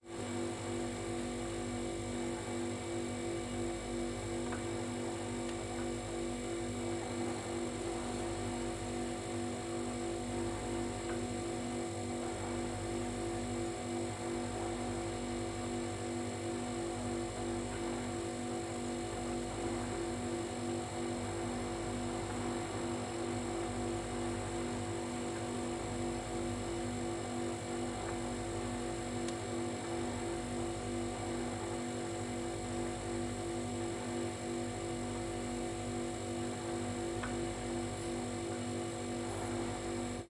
Refrigerator Noise Close 1
Recording of a Bosch refrigerator.
Processing: Gain-staging and soft high and low frequency filtering. No EQ boost or cuts anywhere else.
Appliance, Freezer, Hum, Kitchen, Machine, Mechanical, Noise, Refrigerator